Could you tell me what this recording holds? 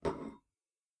Dinner Plate Impact 2
A plate or bowl is placed on a hard surface (kitchen counter). Low-pitched.
clang, foley, kitchen